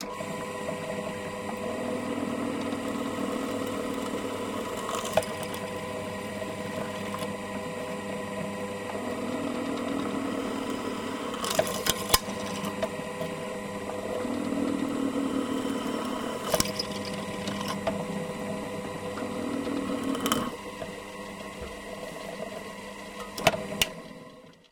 drilling several holes in a piece of steel, then turning the drill off agian